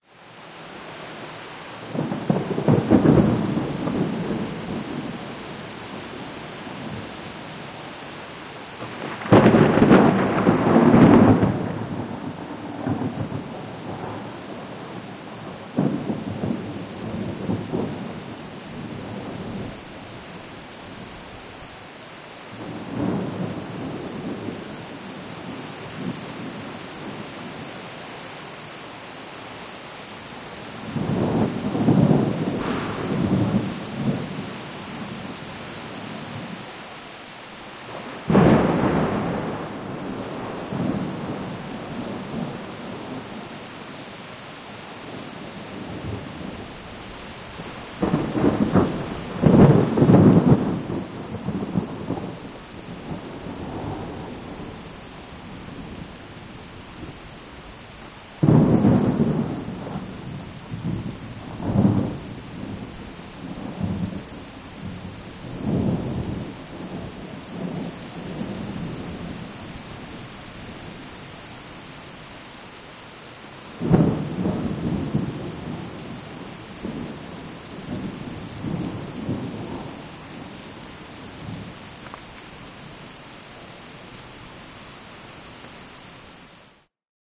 Rain and Thunders
thunders and rain sounds recorder in 2014 with tascamDR07 in México, the sounds were mixed in adobe audition